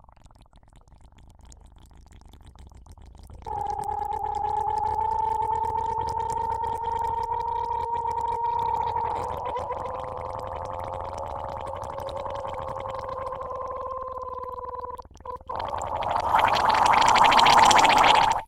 sqeaking whining bubbles in water with burst
blowing through a large diameter dringking straw into bubble tea and humming high